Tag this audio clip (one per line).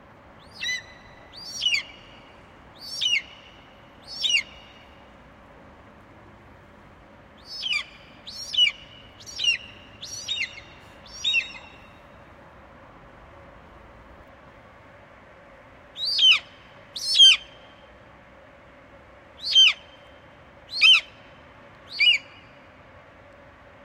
great
sound
relax